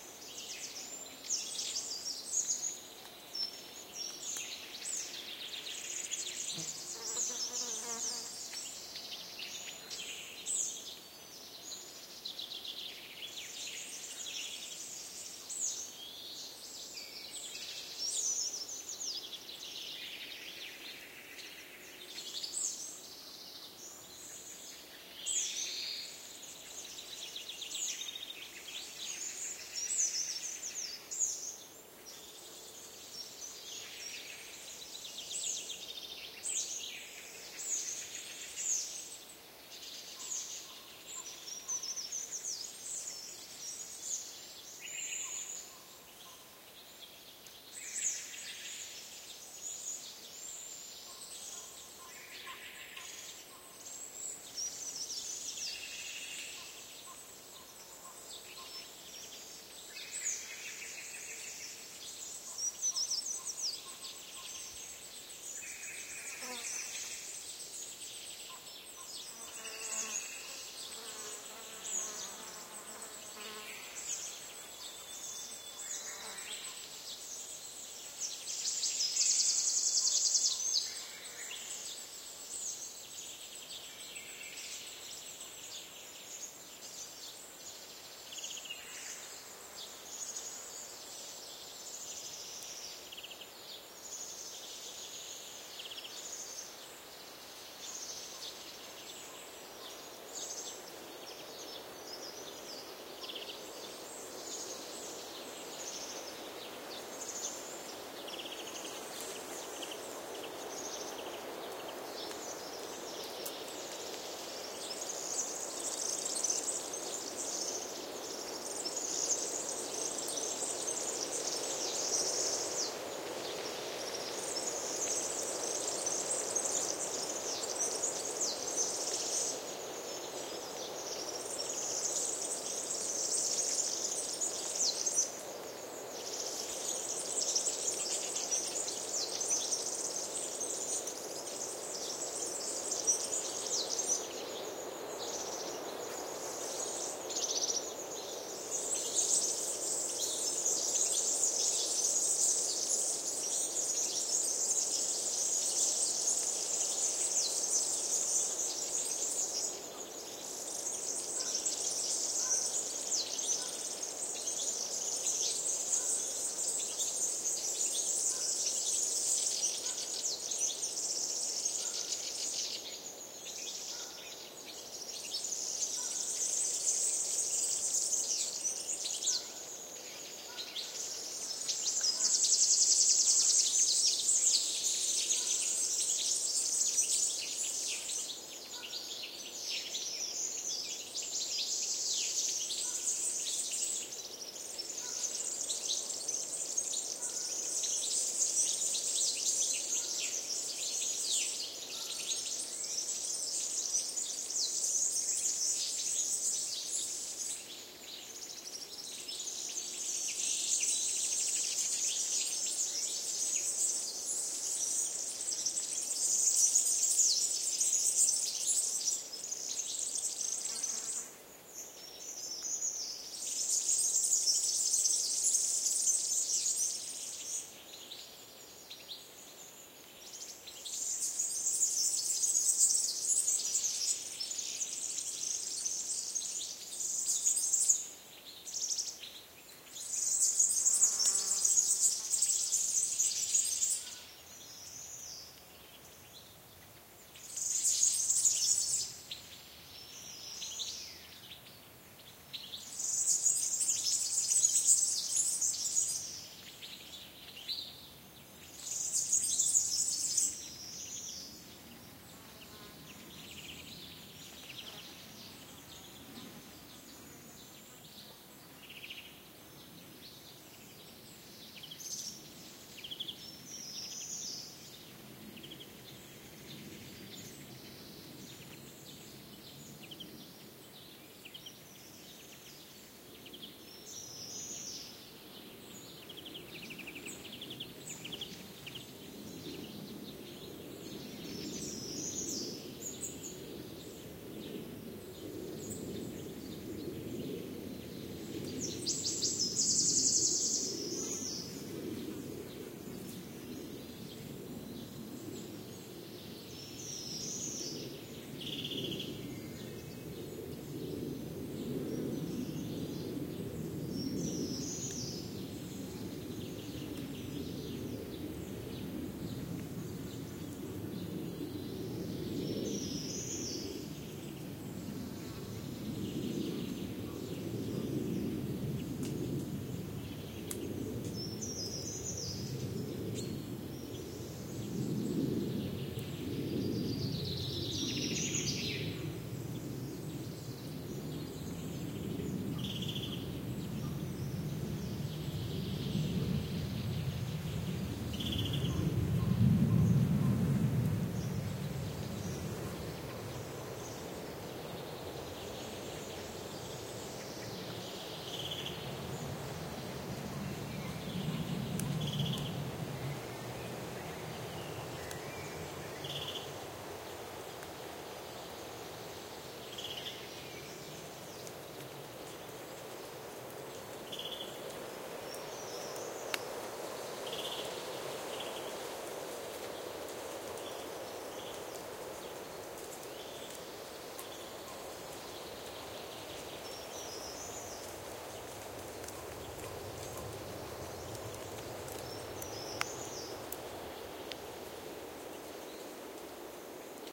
Spring pine forest ambiance. Warbler, Serin, Chaffinch, Crow and Blackbird can be heard among others, along with soft wind on trees and some insects flying around. Wind strength increases by 1:40, and at 4:30 an aircraft overheads but other than that quite pristine. Sennheiser MKH30+MKH60 into Shure FP24 and Edirol R09 recorder.
Recorded near Hinojos (S Spain)